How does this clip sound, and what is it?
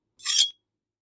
S19 Sword Draw 1
Sword fighting sounds
blade, swords, fight, sword